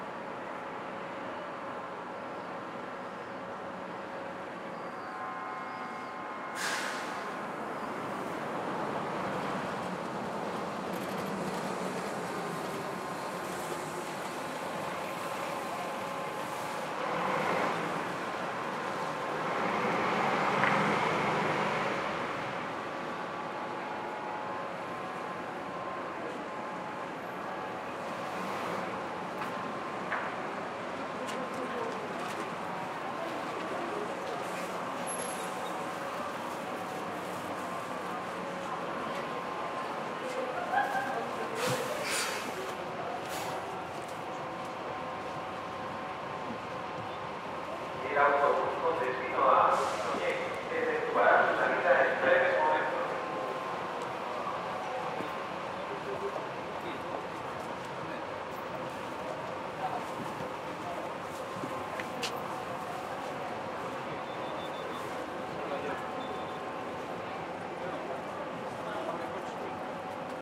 Ambiente - estacion de autobuses 2
Environment from a bus station
MONO reccorded with Sennheiser 416